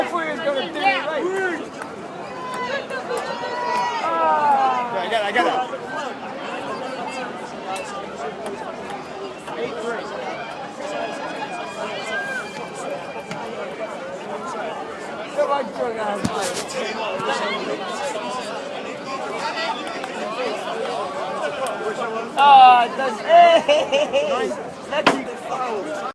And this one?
bristol city centre